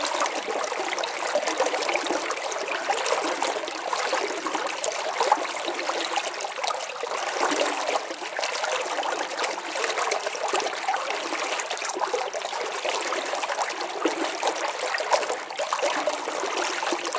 Water Stream
sound of flowing water